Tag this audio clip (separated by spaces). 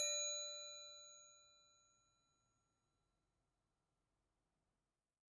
dissonant tonal metal ring spanner harmonics hit Wrench percussive chrome high